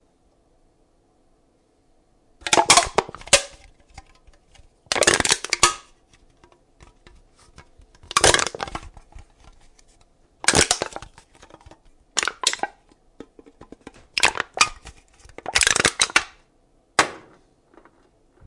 ZOOM0030 MN
Someone crushing a soda can
crush, Tin, can